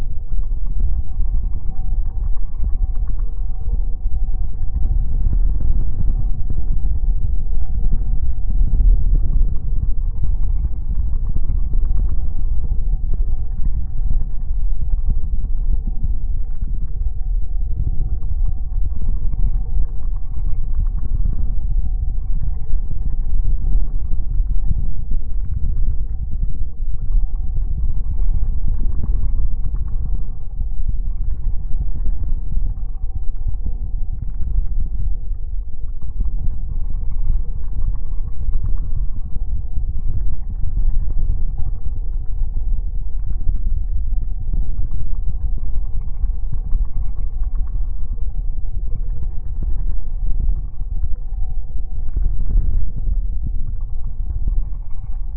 Artificially created ambiance of a distant volcano rumbling, filled with bubbly and loud lava. Made with Audacity.
Ambience, Lava, Volcano